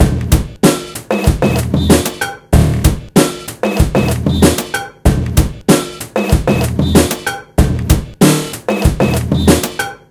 combination multi sample with vst slicex
beat, beats, breakbeat, breakbeats, drum, drum-loop, drumloop, drumloops, drums, hip, hiphop, hop, loop, loops, quantized, sample